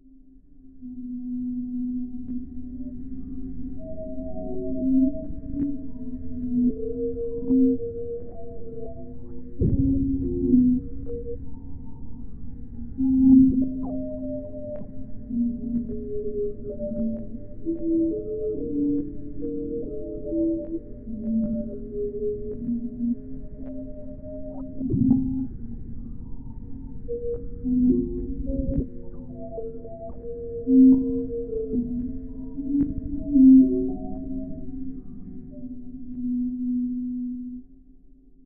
ERH p1.2o2bb12 14 alien sounds lostlaboratory-rwrk
remix of "p1.2o2bb12_14_alien_sounds" added by ERH (see remix link above)
slow down, edits, vocode, filter, reverb, delay, and gently compression
film, sky, atmosphere, reverb, sci-fi, creepy, alien, astral, deep, score, processed, dark, illbient, pad, granular, delay, backgroung, filter, galaxy, ambient, air, fx, electro, effect, abstract, ambience, outher, remix, psychedelic, floating